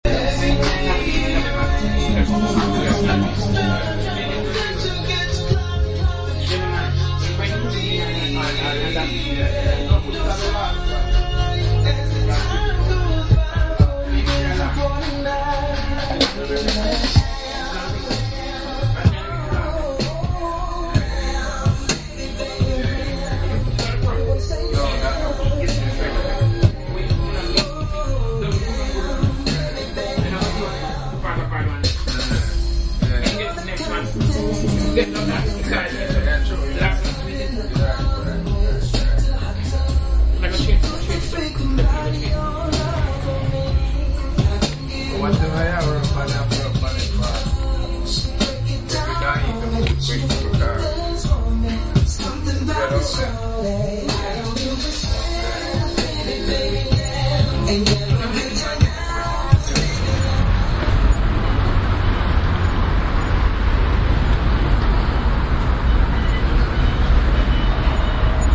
Seven Sisters - Record shop (Every Bodies Music)